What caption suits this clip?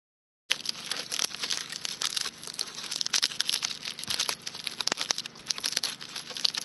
Realistic Fire Sound
Highly realistic crackling sound as made by a fire; actually made by the crushing of some spines from a grass-tree.
blaze, burn, burning, combustion, crackle, crackling, fire, fireplace, flame, flames, flammable, heat, hot, logs, sparks, stove